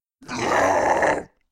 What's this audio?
A mutant screams in pain, or because it is dying?
scary, mutant, vocal, dying, scream, death, pain, zombie, horror, monster